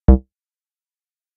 Neutral Click Resonant Static Tube Sound
UI sound effect. On an ongoing basis more will be added here
And I'll batch upload here every so often.